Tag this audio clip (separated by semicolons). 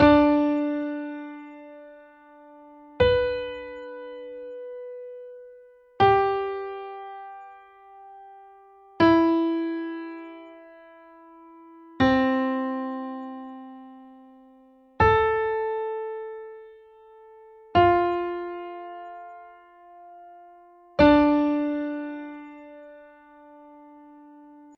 minor; stacked; dorian; d; thirds